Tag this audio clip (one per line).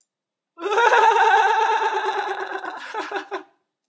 laughter
crazy
laugh
funny